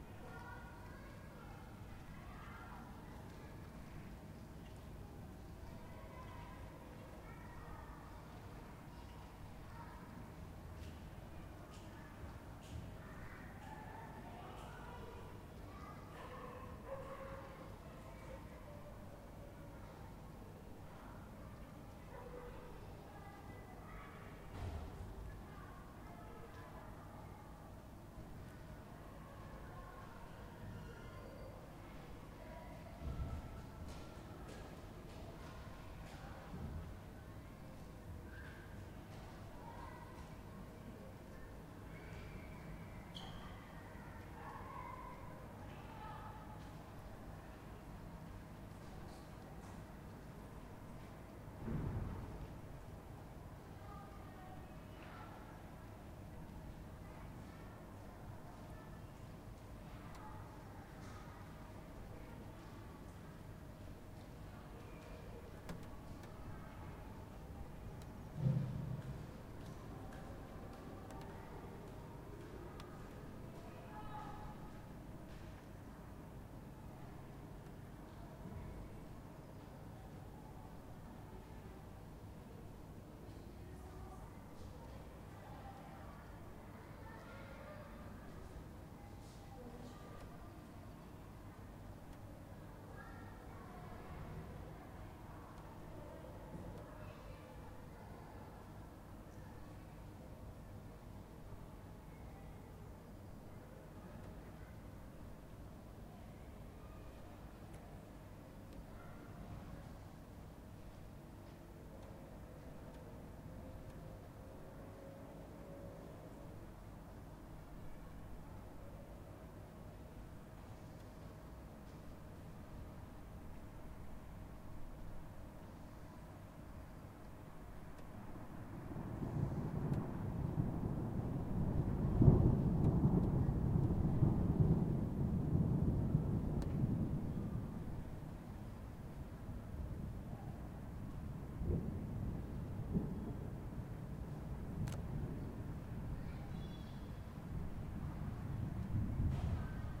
Kid in distance
Kids playing on outside from distance with storm coming.